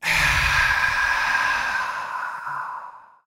male sighs, 'end of the day'-sigh
sigh, male, aaah